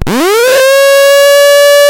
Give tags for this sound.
lofi; circuitbent; circuit

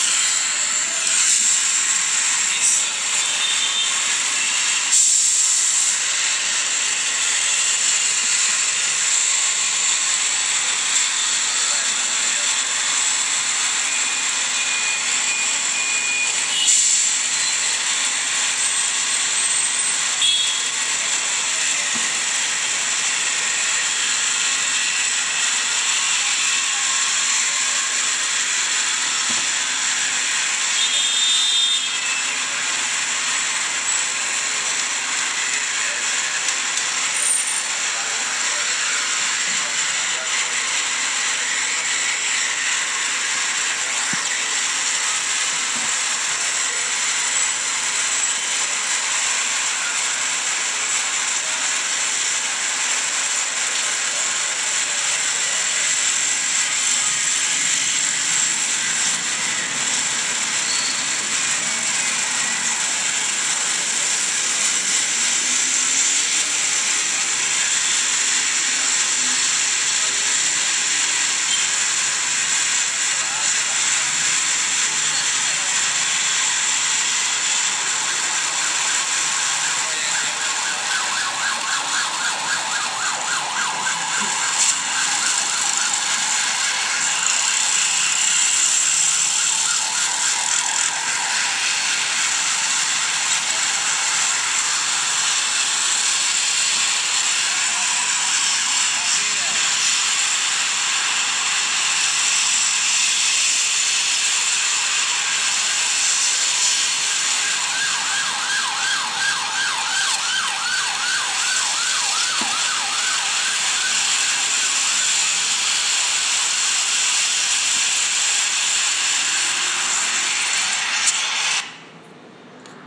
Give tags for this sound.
Bucaramanga; Paisaje; prueba; registro; SIAS; sonoro

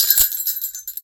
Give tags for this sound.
sleigh; bells; foley; christmas

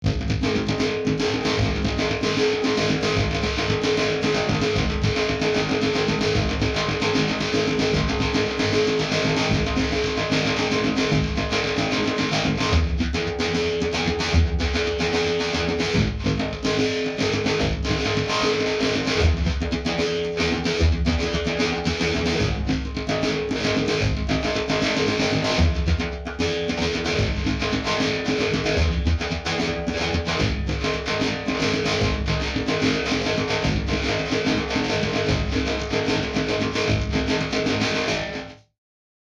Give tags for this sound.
dark; doctor-who; resonator; sci-fi

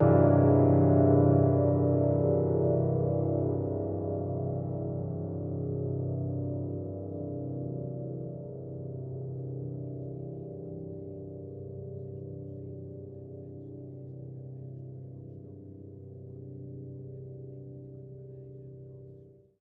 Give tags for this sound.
chord; drone; fading; low; piano

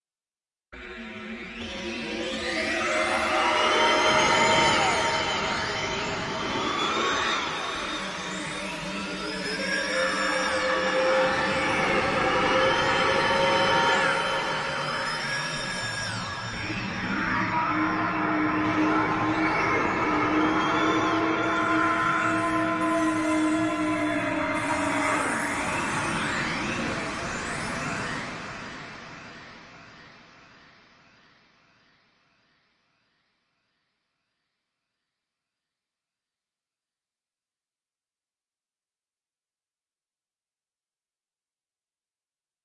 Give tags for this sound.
future; deep; background; futuristic; drive; hover; ambient; noise; pad; engine; energy; electronic; atmosphere; emergency; ambience; Room; sci-fi; bridge; spaceship; space; fx; rumble; soundscape; drone; machine; dark; impulsion; starship; sound-design; effect

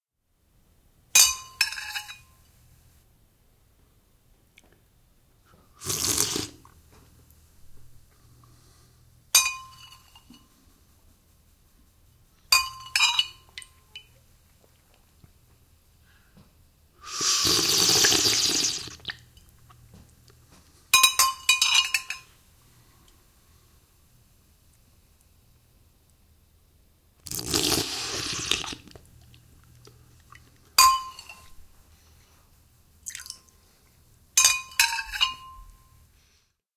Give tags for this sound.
dish; porcelain; scrape; slurp; soup; spoon

